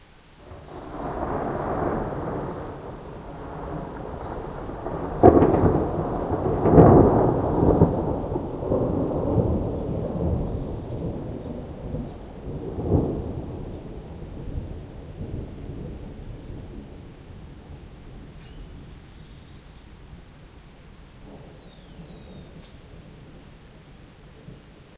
One of the thunderclaps during a thunderstorm that passed Amsterdam in the morning of the 9Th of July 2007. Recorded with an Edirol-cs15 mic. on my balcony plugged into an Edirol R09.
thunderclap, thunderstorm, streetnoise, nature, rain, field-recording, thunder